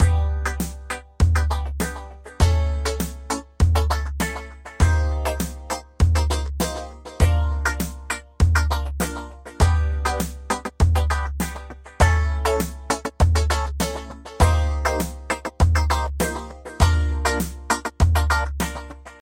Disco Beat 100 by Music Fish.
disco, rhythm-guitar